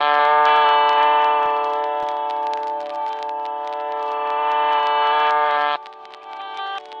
This is from a collection of my guitar riffs that I processed with a vinyl simulator.This was part of a loop library I composed for Acid but they were bought out by Sony-leaving the project on the shelf.